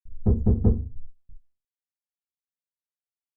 added room verb and low pass "headphone" effect to knock knock knock
door, knock, Ambisonics, headphones, binaural, room